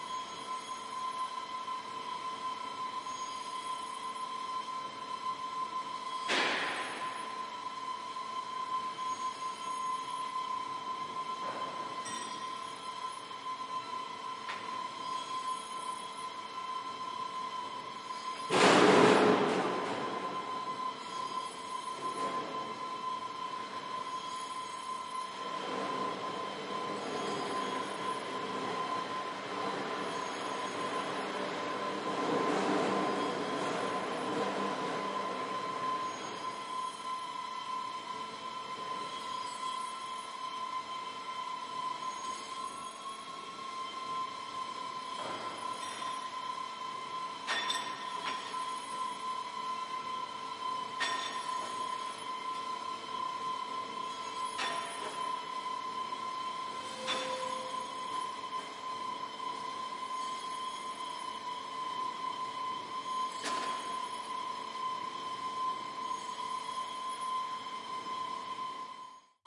Industrial factory working 02
Sound full of industrial sounds, from hard machines to spectacular noises. Sound was recorded in a gun factory in Czech Republic.
The size of hall adds a natural reverb effect.
Recorded with Tascam DR 22WL.